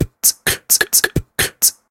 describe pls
me beatboxing at 130bpm, typical beat, loopable
Recorded with Sony HDR PJ260V then edited using Audacity